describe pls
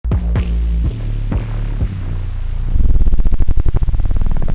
res out 04
In the pack increasing sequence number corresponds to increasing overall feedback gain.
computer-generated, feedback-system, neural-oscillator, synth